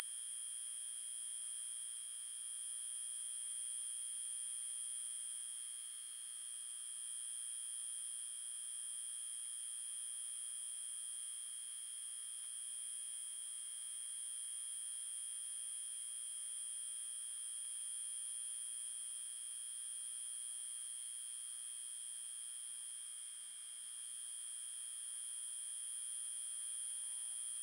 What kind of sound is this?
light bulb hum buzz3
bulb, buzz, hum, light